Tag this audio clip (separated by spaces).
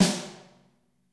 snare drums percussion